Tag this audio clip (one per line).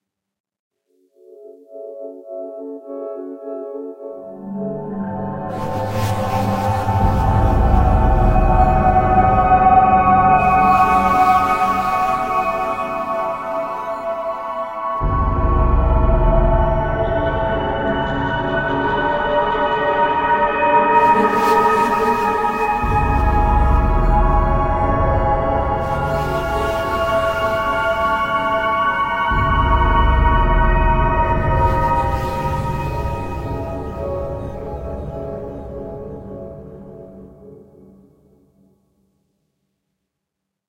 Alien
Ambient
Dark
Game-Creation
Horror
SF
Scary
Science-Fiction
Soundcluster
Soundscape
artifact